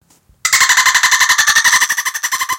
Dolphin Noise-
I found out my friend can make perfect dolphin noises, so naturally, I recorded her. (she knows)
ocean-sounds, ocean, dolphin-sound